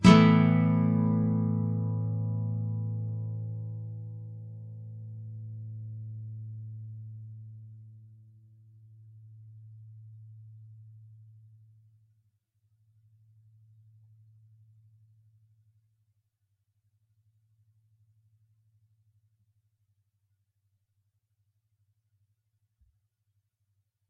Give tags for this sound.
acoustic
clean
guitar
nylon-guitar
open-chords

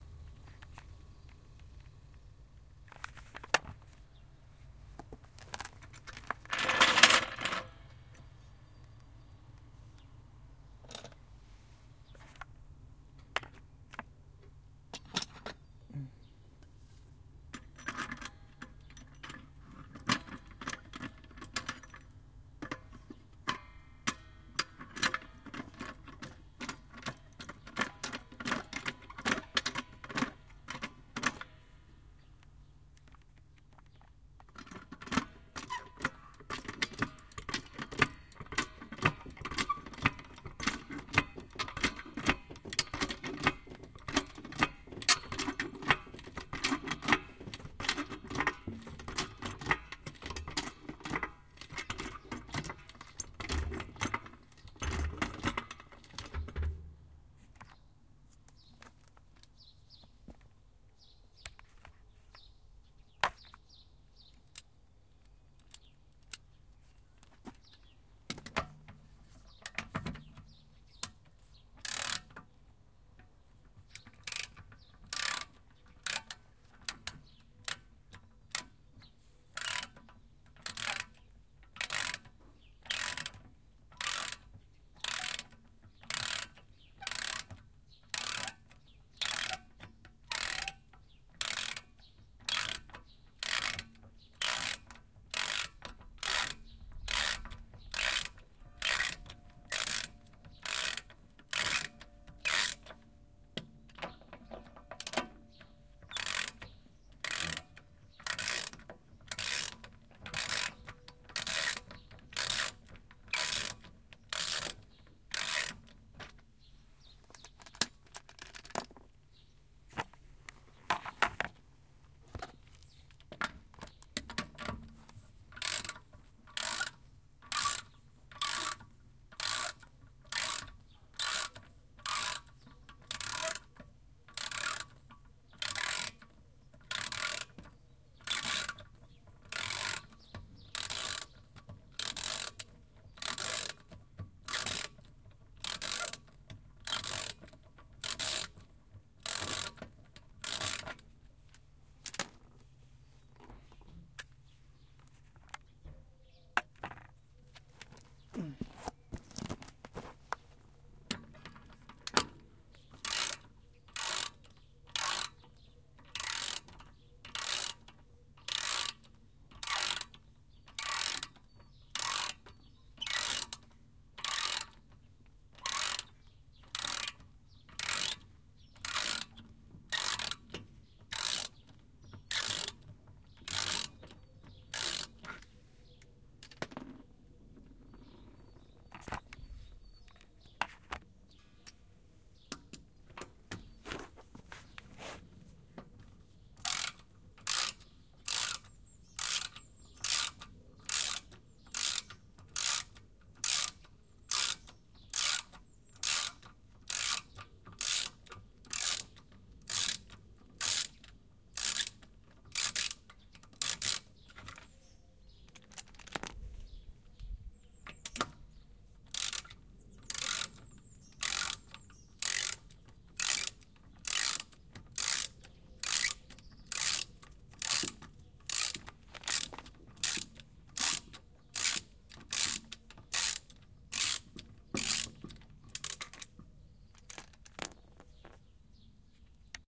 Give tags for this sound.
recording
vehicle
ratchet
anziehen
rattle
auto
crunchy
knarre
car
socket
wrench
field
tires
change
tool
reifen

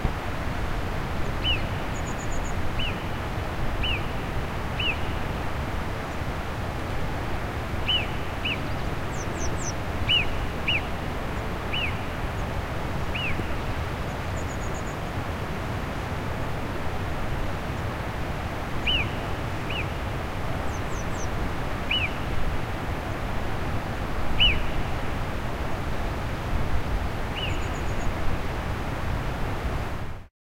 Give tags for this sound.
Whistle
Mountain
Fieldrecording
Torrent
Marmot

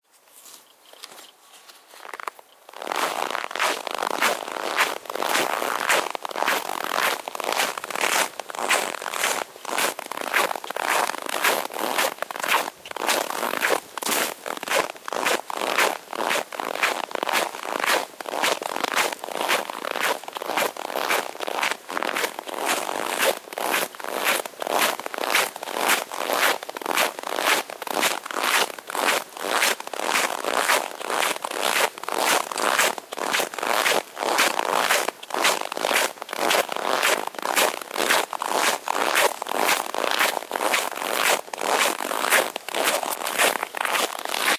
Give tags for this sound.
field-recording
footsteps
Snow-underfoot
squeaking
squeaks
squeaky

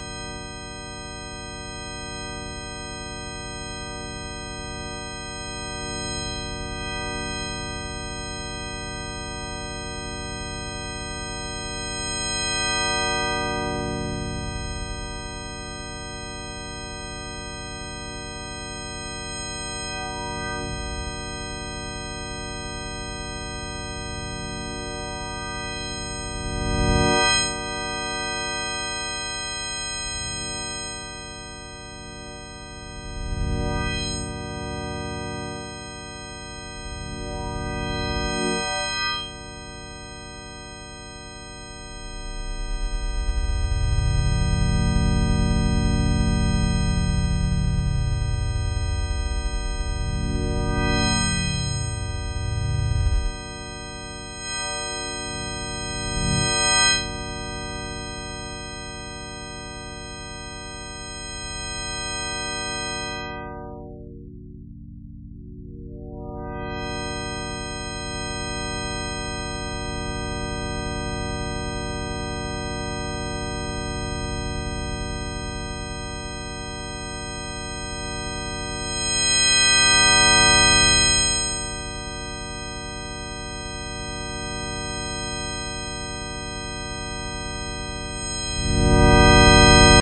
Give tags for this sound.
image
Thalamus-Lab
synthesized
processed

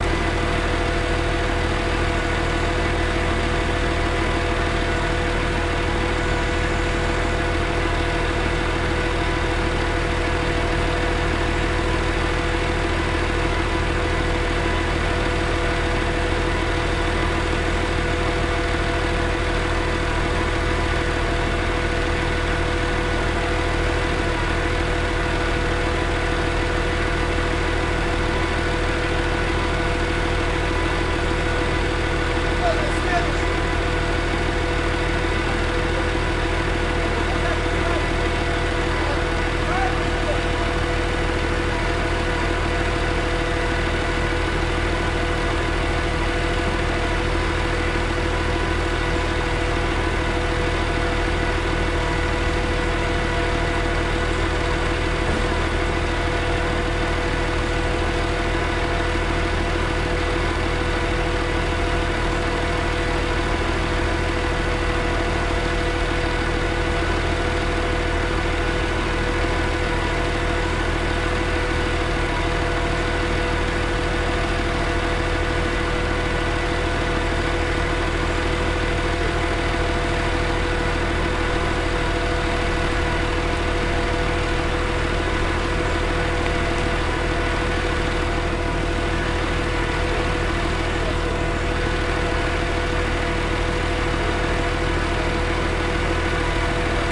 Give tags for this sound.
car-lift
car
city
construction

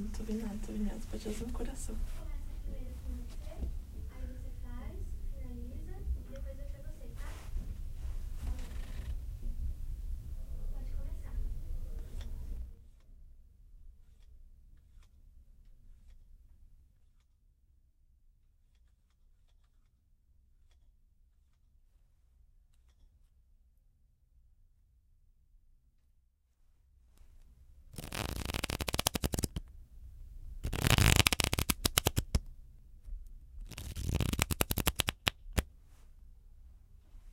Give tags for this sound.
baralho
cards
cartas
playing

